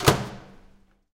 Closing microwave loudly
Recorded with a Sony PCM-D50.
Manually closing microwave oven.
door, loud, manual, mechanic, microwave, oven, shutting